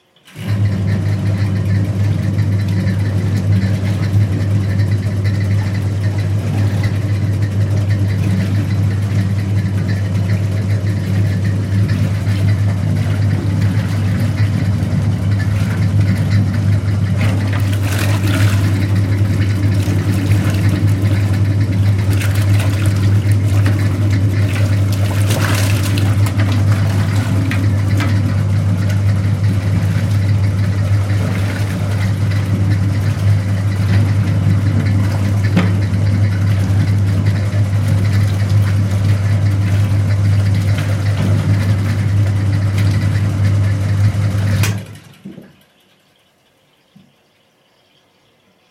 Old soviet washing machine "Сибирь-2" ("Siberia-2") do work. We hear at the end of the sound one click and ticking of the mechanical timer. User can set washing timer to 5 minutes maximum at 1 time.
household, laudry, soviet, USSR, washing, washing-machine, water